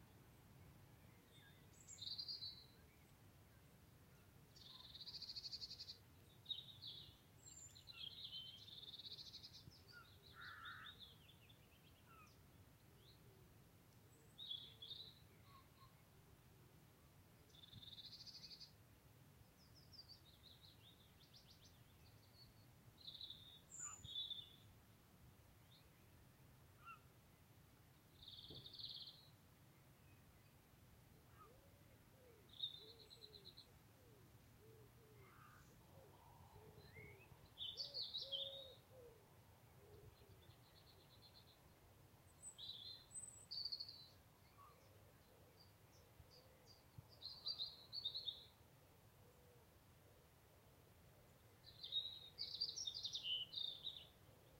The sorrowful song of a robin accompanied by other assorted birds.